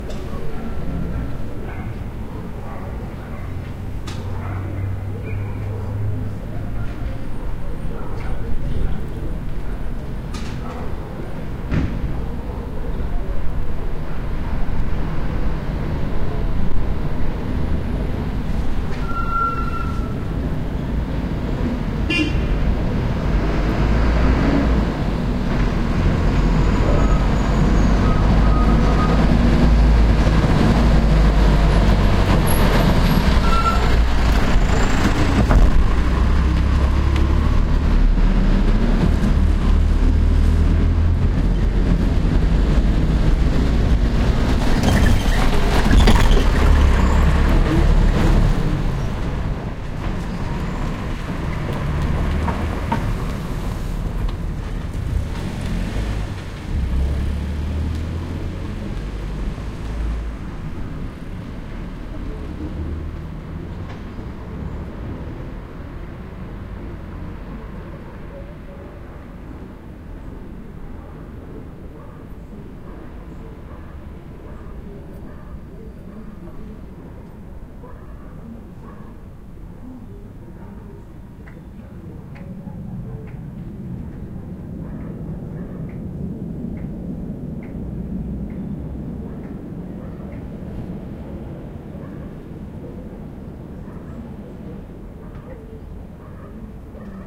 heavy truck real pass through residential area bumpy road Cusco, Peru, South America
America, bumpy, heavy, pass, Peru, road, truck